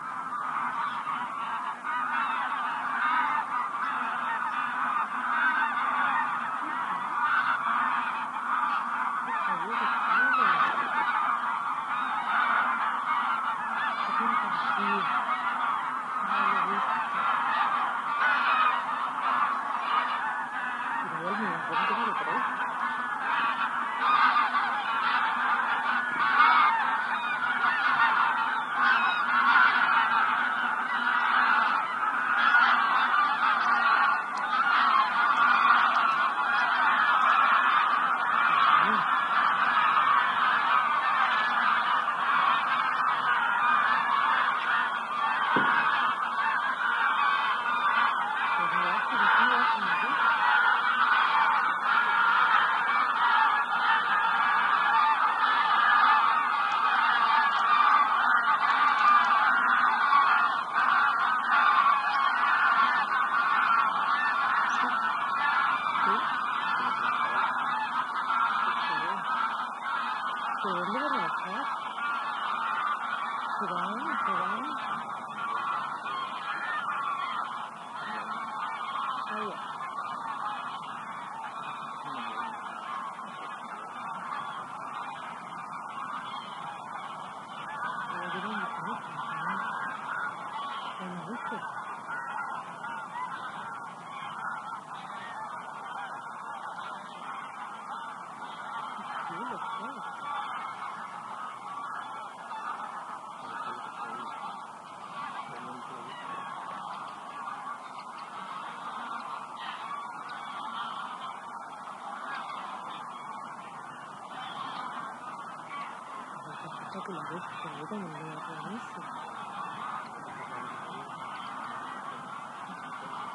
20081130.geese.overheading
Several hundred Greylag geese on migration arriving to the marshes of Donana, S Spain, on the last day of November 2008. Sennheiser MKH60 + MKH30 into Shure FP24 and Edirol R09 recorder
grauwe-gans, greylag-goose, oca, graugans, marshes, spain, bird, ansar, migration, field-recording, oie